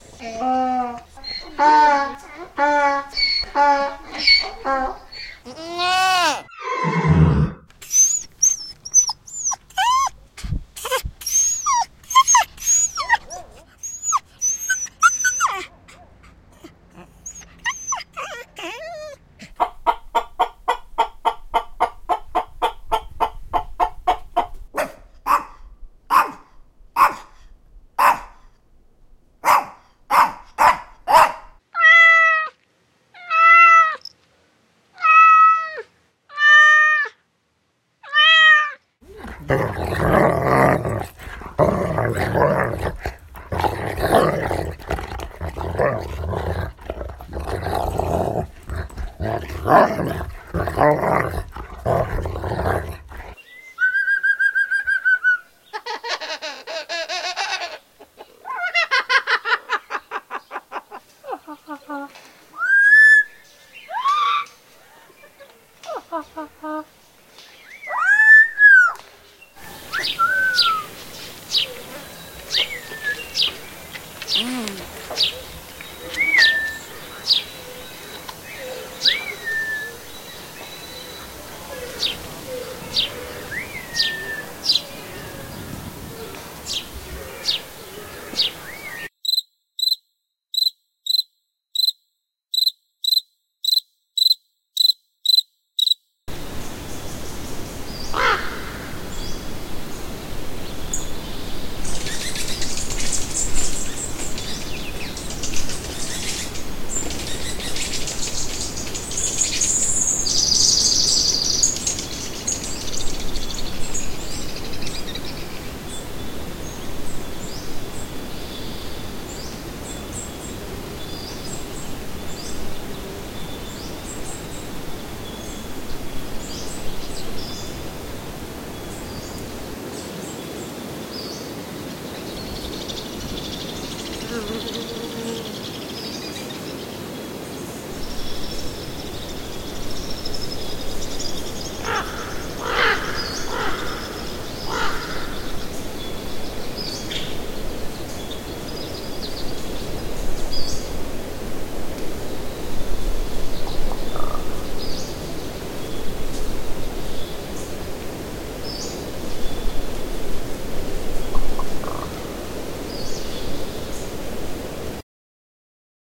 This is a morphagene reel with an assortment of animal noises.